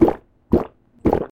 glug glug glug
or whatever the sound is of chugging a beer.
mix of 521585__boyscontentclub__drinking-liquid-sound and 56270__q-k__water-drink